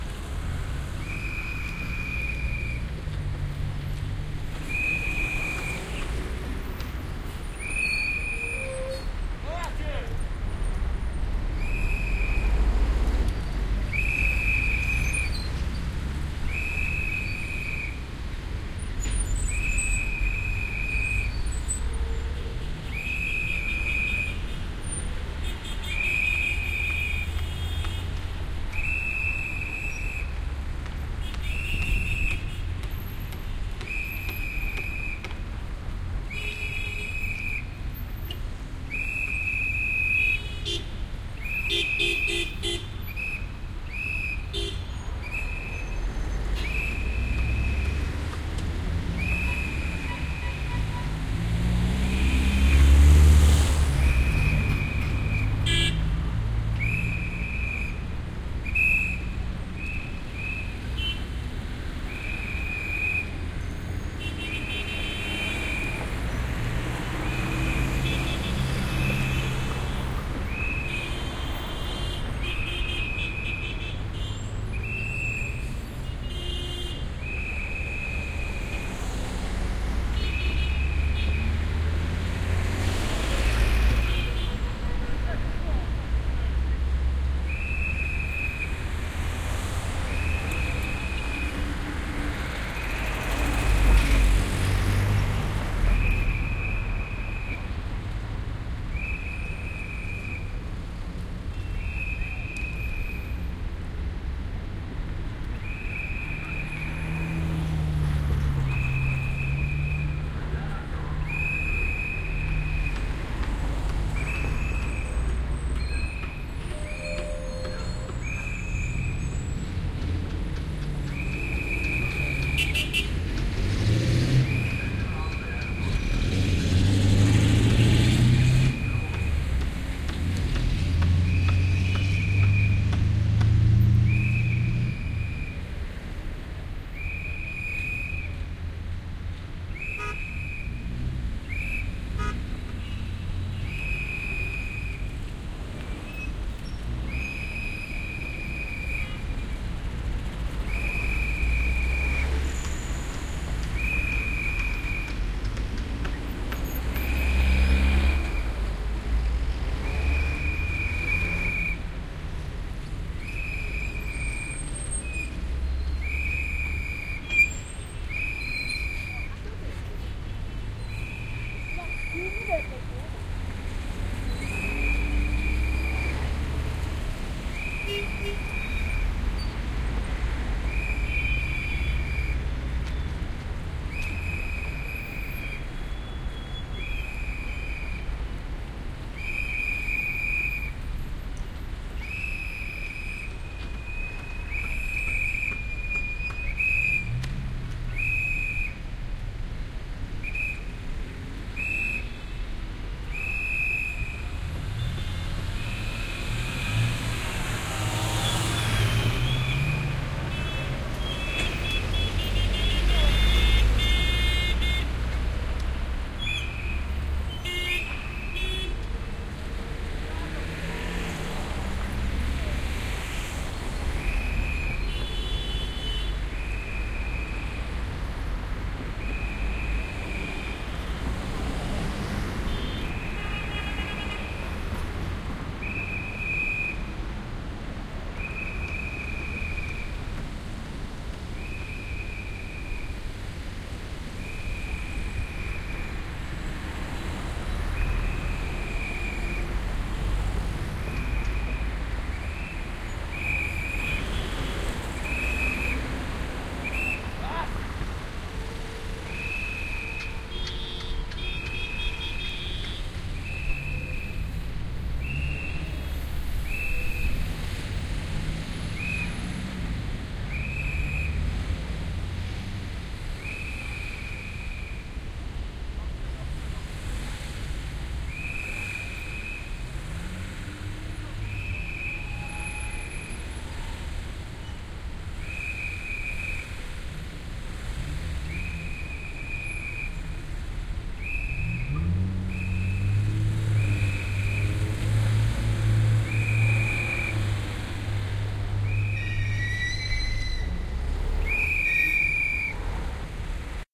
mongolian intersection 01
Standing at a busy intersection during rush hour in Ulaanbaatar, Mongolia. The traffic was coming from all directions and there was a traffic officer attempting to direct everything. Recorded with The Sound Professionals in-ear mics into a modified Marantz PMD661.
cars, whistle, asia, beep, city, trucks, traffic, mongolia, binaural, horns, noisy